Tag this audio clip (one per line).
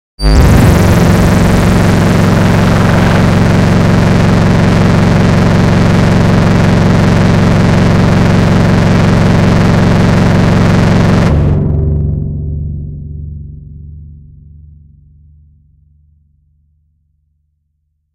alarm
dub
effect
fx
rasta
reggae
reverb
scifi
siren
space
synthedit
synthesized